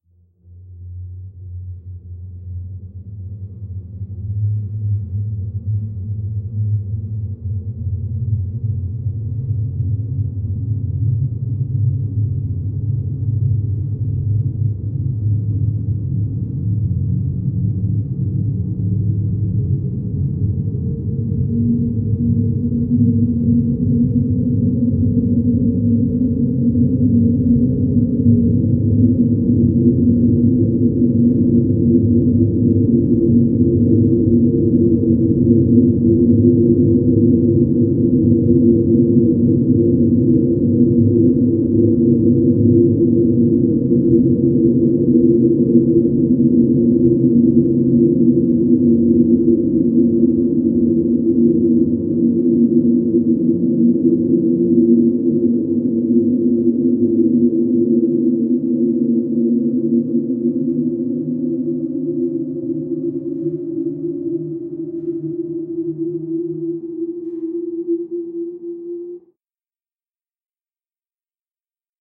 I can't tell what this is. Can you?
Space Sweep 09
This sample is part of the “Space Sweeps” sample pack. It is a 1:12 minutes long space sweeping sound with frequency going from low till high. Starts quite droning. Created with the Windchimes Reaktor ensemble from the user library on the Native Instruments website. Afterwards pitch transposition & bending were applied, as well as convolution with airport sounds.
ambient, drone, reaktor, soundscape, space, sweep